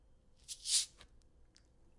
Plastic bottle soda 1
bottle of soda being opened